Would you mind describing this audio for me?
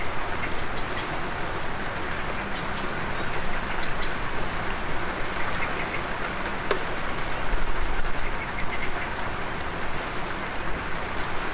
Short clip of heavy rain and birdsong.
Recorded with a rode NT-5 placed outside window pointing down towards gazebo roof.
Used Compression on sample
Raindrops, England, birds, Outside